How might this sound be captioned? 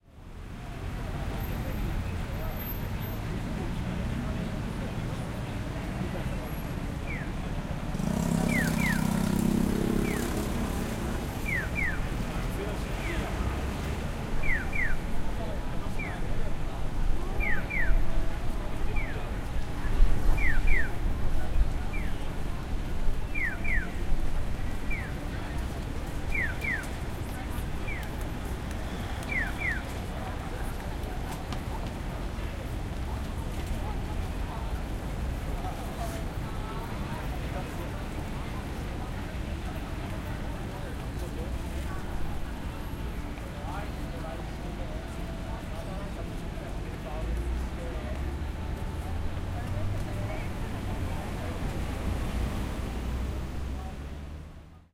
0401 Traffic light sound
Traffic light for pedestrians. People talking in Japanese. Traffic. Music in the background. Motorbike engine.
20120807
japanese, traffic, engine, motorbike, alarm, tokyo, field-recording, cars, japan